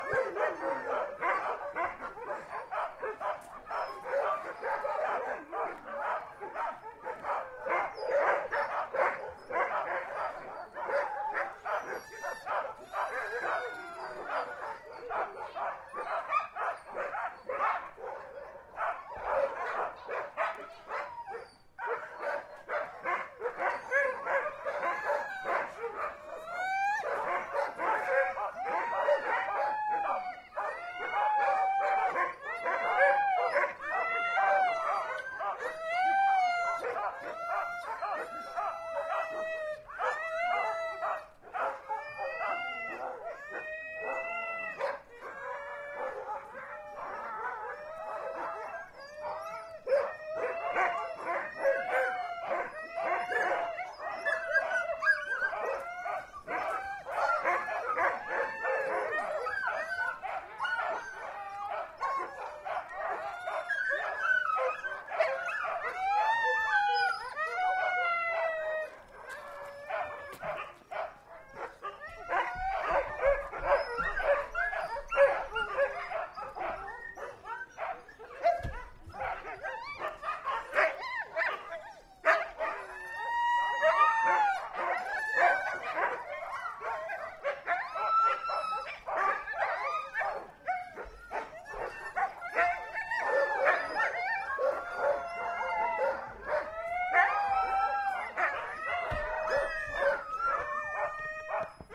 A pack of Alaskan Huskies barking and whimpering.
animal, bark, barking, canine, crying, dog, dogs, howl, pack, puppy, whimpering, whining, woof